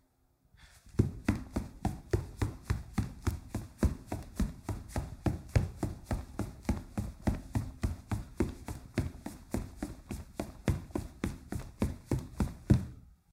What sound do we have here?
01-34 Footsteps, Wood, Socks, Running 1
Footsteps, running on wood floor with socks
fast, footsteps, wood, running